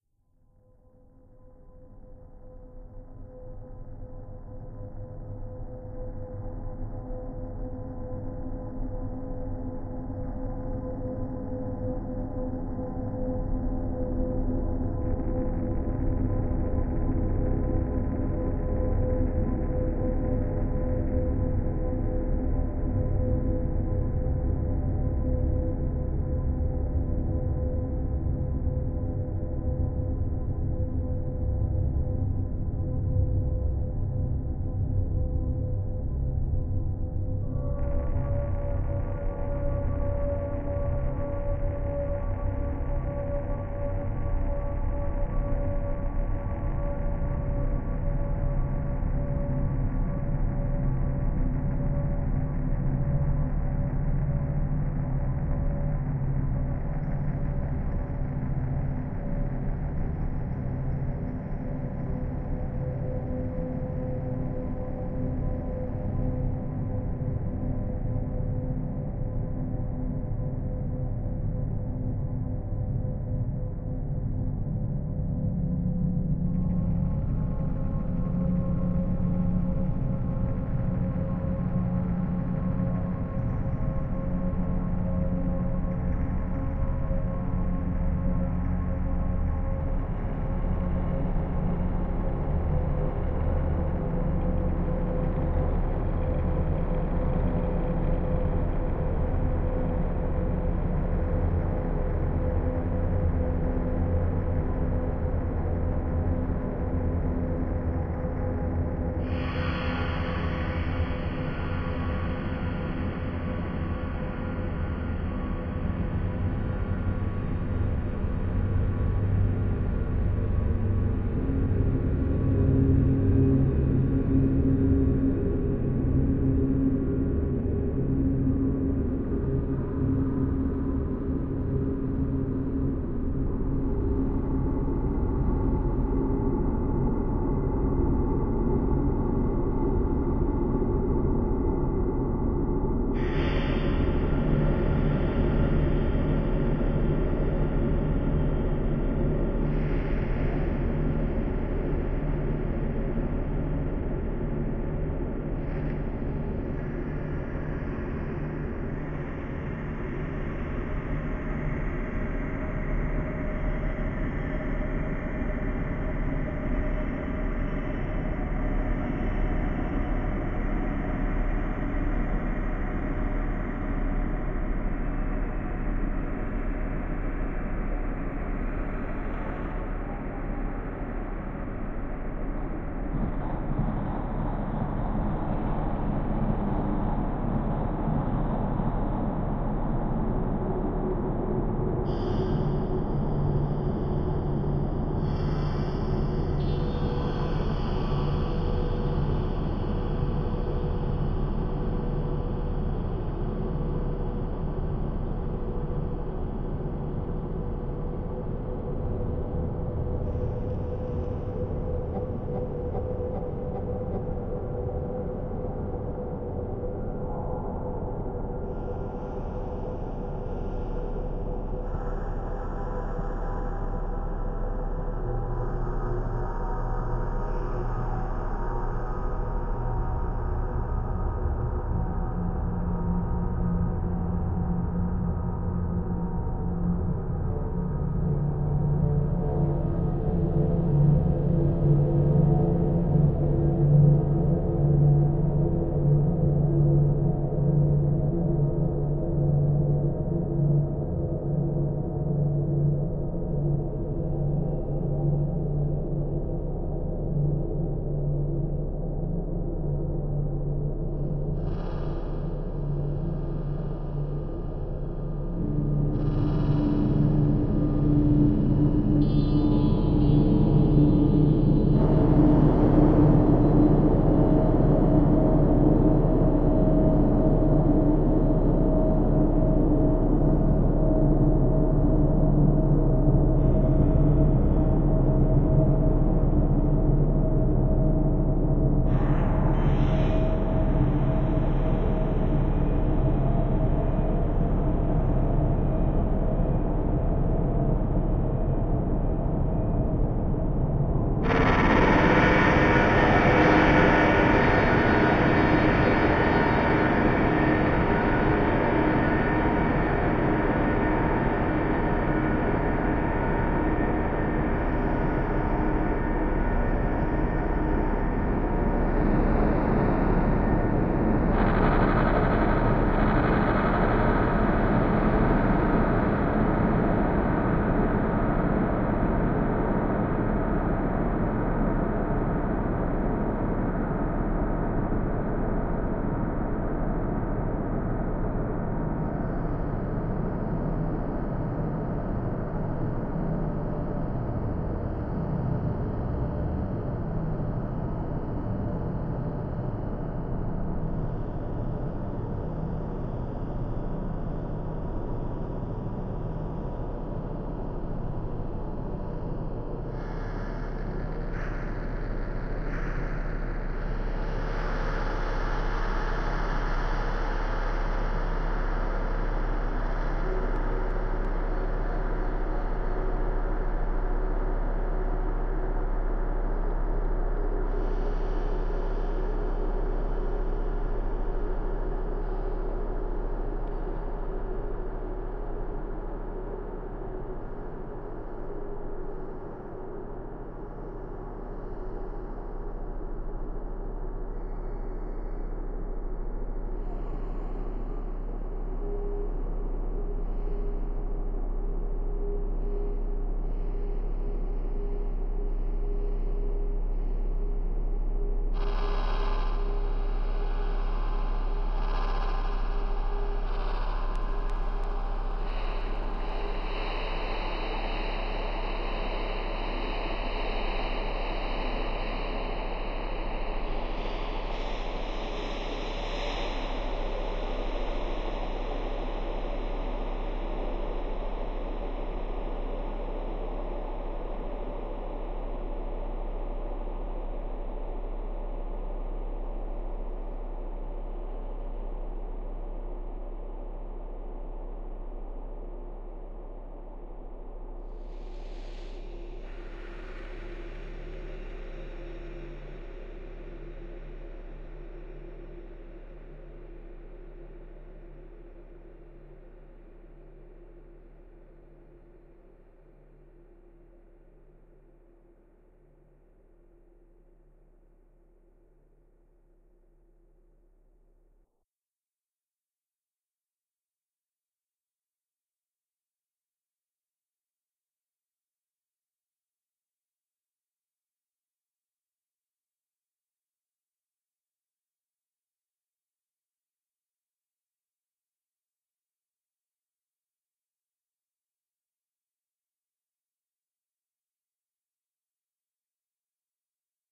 Dark Ambient 022
ambience, ambient, atmo, atmosphere, background, background-sound, creepy, dramatic, evil, experimental, film, haunted, horror, oscuro, ruido, sinister, soundscape, suspense, tenebroso, terrifying, terror, thrill, weird, white-noise